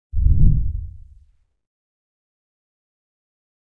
White noise soundeffect from my Wooshes Pack. Useful for motion graphic animations.